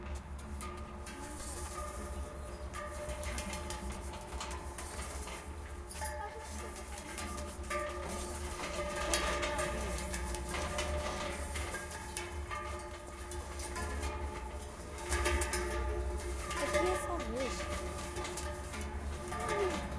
Sonic snaps CEVL Fence
Field recordings from Centro Escolar Vale de Lamaçaes and its surroundings, made by pupils.
Sonicsnap, aes, Lama